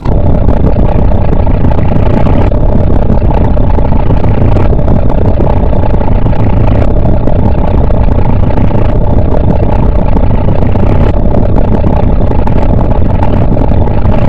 M/Stena Danica, has four short stroke engines,each on 10,000 HP. The engines do not stand upright, but have a certain tilt in order to minimize the total height of the ship (a ferry). Her DWT is 30,000 tons. The machine room has also a number of diesel-electric machines, pumps, fans and other stuff, which makes a total noise that is unbearable. I have minimized those sounds by means of filter and I have not used a wide angle microphone. So, most of what you hear is from one of the four main engines.
engines, marine, diesel, ship